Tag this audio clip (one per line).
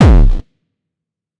compressors
sample
Kick
distortion